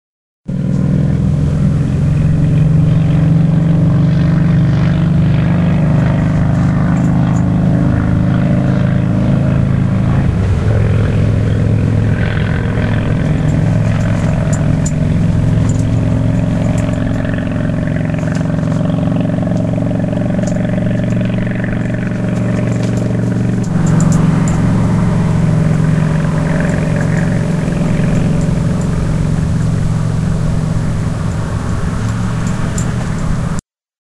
br Harleys Utah Hill 2
Harley motorcycles going up a hill.
field-recording, harley, motorcycles